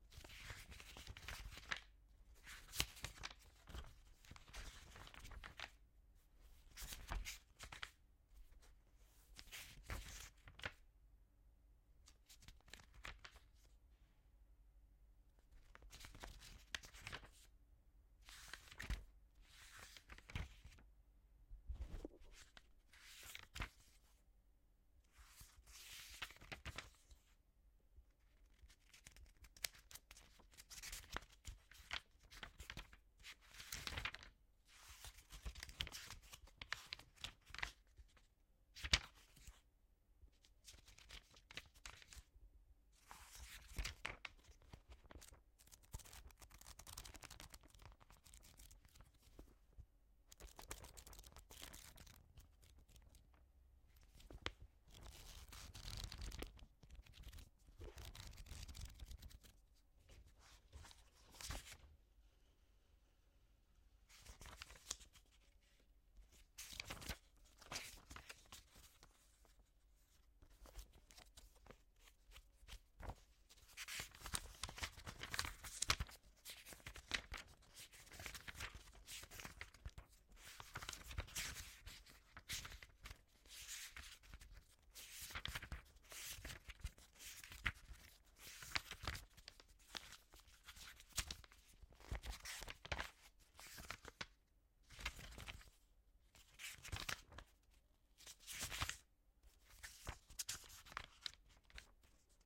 Book - Handling and flipping through pages
Flipping through book pages + handling of book and paper sounds. Recorded with Audio-Technica boom mic on Tascam DR60dM2
movement, pages, handle, moving, hard-cover, book, flip, notebook, paper, flipping, page-turn, turning